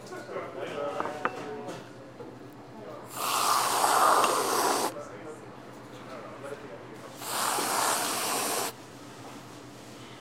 This is a recording of a barista shooting whipped cream onto the top of a drink at the Folsom St. Coffee Co. in Boulder, Colorado.
whipped-cream, coffee, shop